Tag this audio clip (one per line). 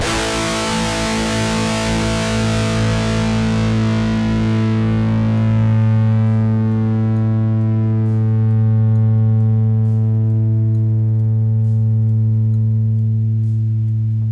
13THFLOORENTERTAINMENT
2INTHECHEST
DUSTBOWLMETALSHOW
GUITAR-LOOPS
HEAVYMETALTELEVISION